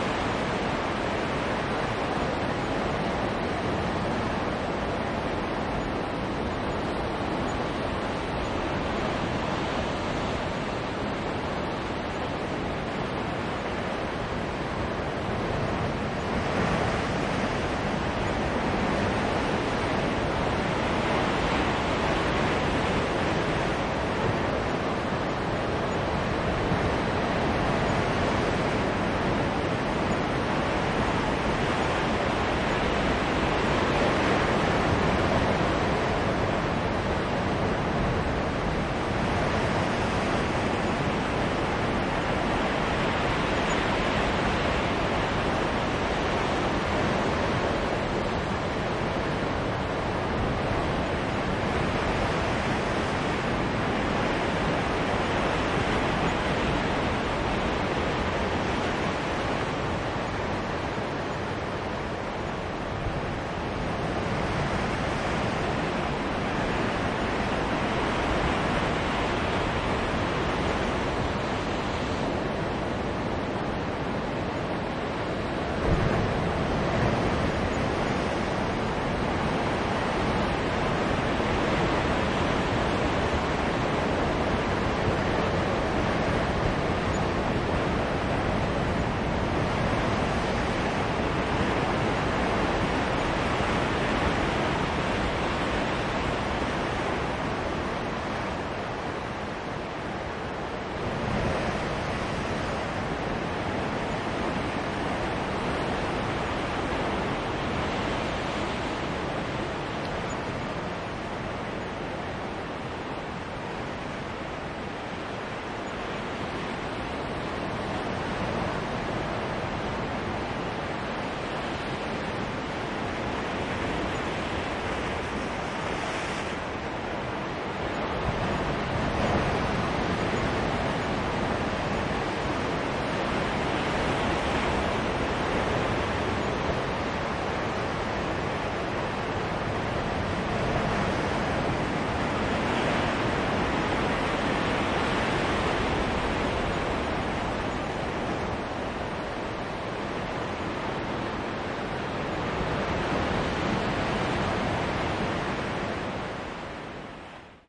Rompeolas en Baiona
Field-recording, Mar, Paisaje-sonoro, Sea, Vigo, Zoom-H2n